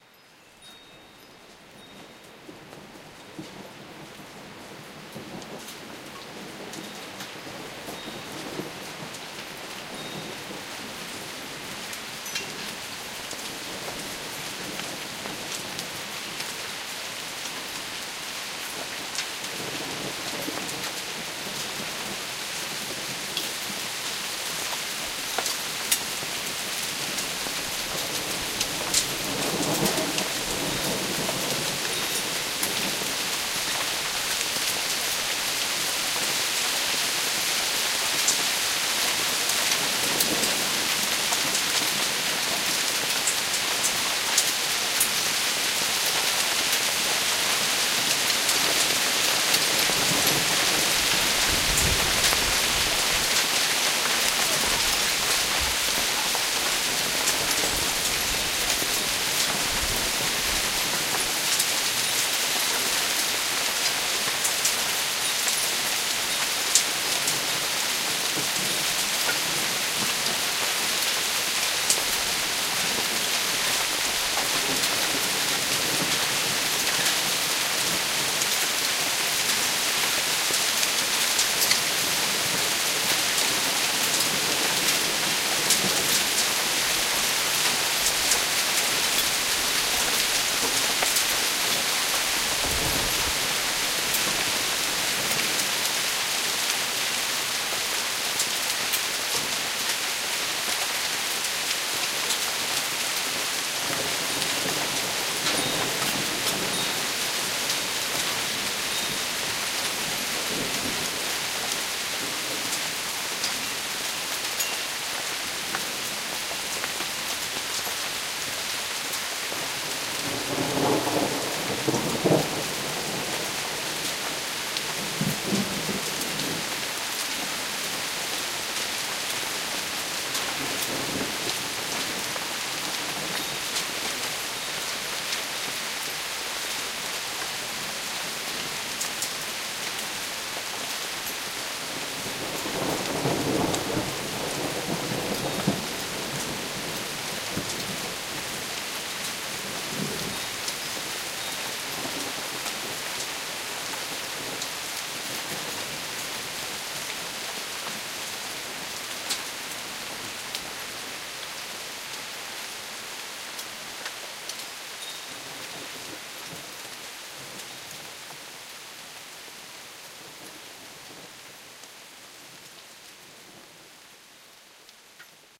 hailstorm 3min
light hail storm in fort collins colorado